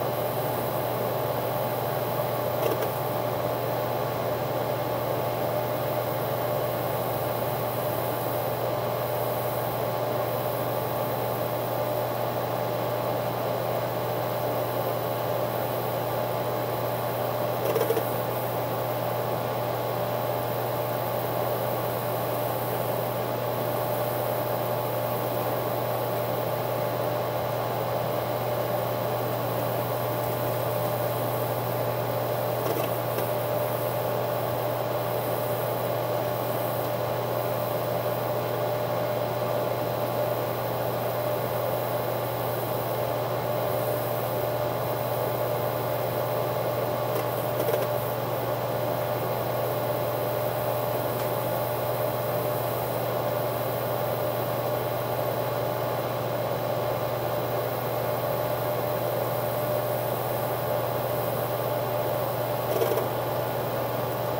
Harddrives spinning
Two harddrives that's working by copying some files.